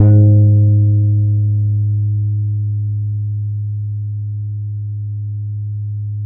A 1-shot sample taken of a finger-plucked Gretsch Electromatic 30.3" (77 cm) scale length bass guitar, recorded direct-to-disk.
Notes for samples in this pack:
The note performances are from various fret positions across the playing range of the instrument. Each position has 8 velocity layers per note.
Naming conventions for note samples is as follows:
BsGr([fret position]f,[string number]s[MIDI note number])~v[velocity number 1-8]
Fret positions with the designation [N#] indicate "negative fret", which are samples of the low E string detuned down in relation to their open standard-tuned (unfretted) note.
The note performance samples contain a crossfade-looped region at the end of each file. Just enable looping, set the sample player's sustain parameter to 0% and use the decay parameter to fade the sample out as needed. Loop regions begin at sample 200,000 and end at sample 299,999.